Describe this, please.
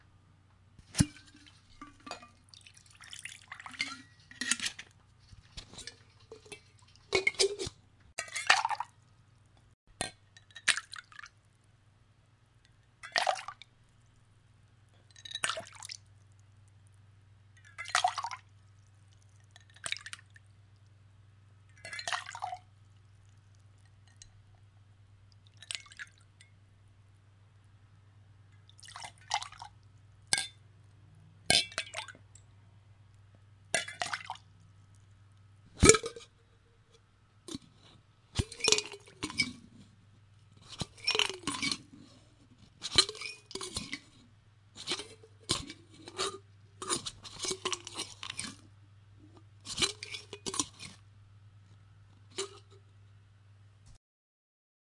Pouring Martini
sounds of liquid being poured from a shaker into a glass
bar, drinks, ice, liquid, martini, pour, splash